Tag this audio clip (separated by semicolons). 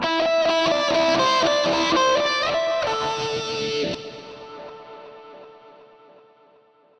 rhythmic; noise; music; feedback; loop; processed; guitar; electronic